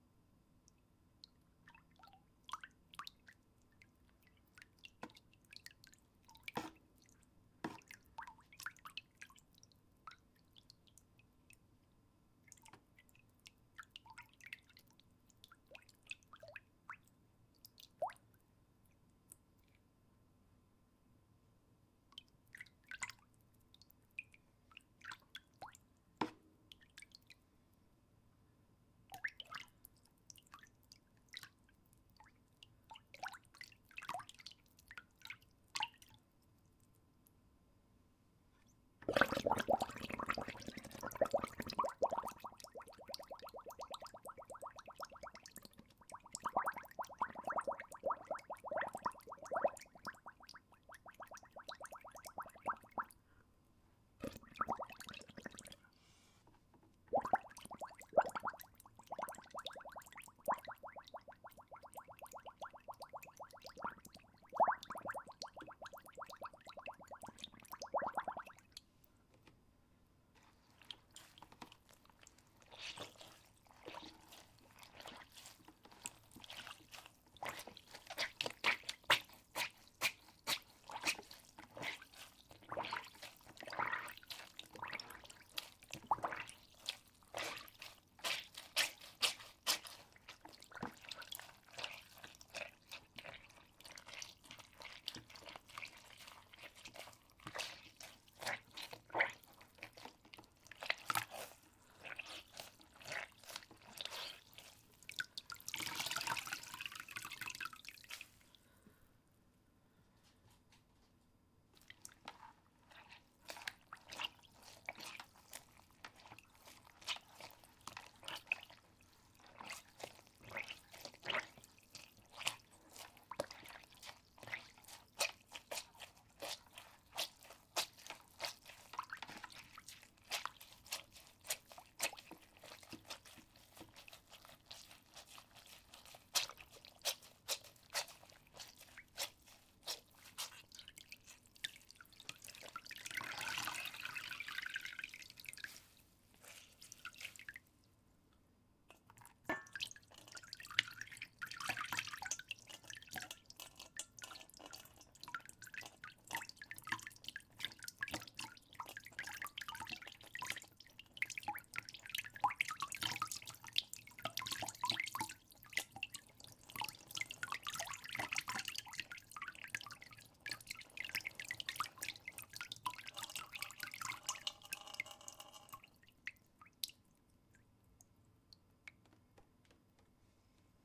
Water Effects
I played around with water in a big bowl. Stirring, blowing into straw and squishing a wet rag.